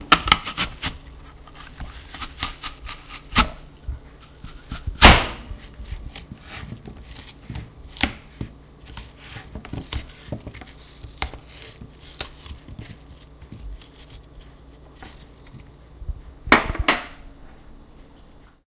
Chopping an apple with an apple wedger on a big plastic cutting board. Recorded on November 24, 2016, with a Zoom H1 Handy Recorder.
metal, chop, kitchen, tools, apple